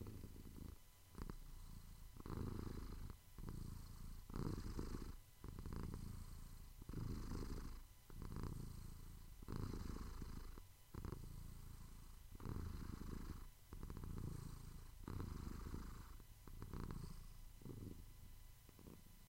purring
Sound Quality: 2 Volume: 2
Recorded at 21/03/2020 16:10:33